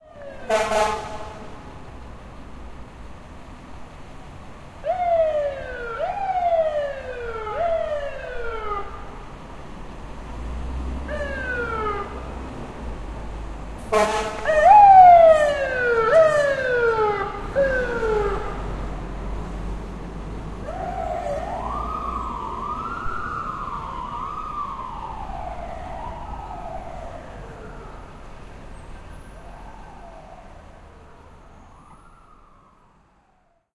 fire truck short siren and horn blasts
NYC firetruck with siren and horn blasts.
FDNY, horns